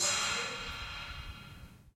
"808" drum sounds played through an Orange Amplifiers "Micro Crush" miniamp recorded for stereo ambiance in the original Batcave. These work well as drum layers under more conventional sounds, and in other creative ways. Recording assisted by Steve and Mikro.